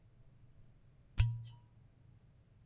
Cork Popping
pop, cork